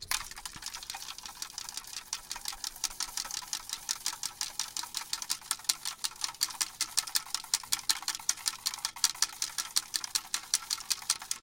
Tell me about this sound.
Swirling around the broken off tab of an energy drink inside of a can.